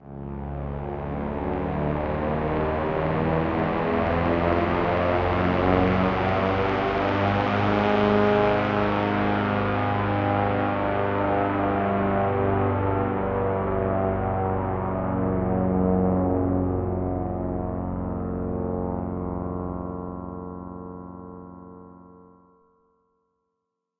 airplane take-off Q13b
A small propeller-driven plane takes off. This sound is not recorded at an airport. It is just a simulation created with different synths.
aeroplane, airplane, airport, air-screw, plane, propeller, propeller-driven, simulation, start, take-off